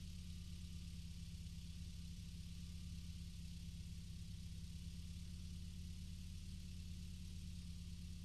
Loopable clip featuring a Mercedes-Benz 190E-16V at approximately 500RPM at ZERO engine load (ie neutral). Mic'd with an Audix D6 one foot behind the exhaust outlet.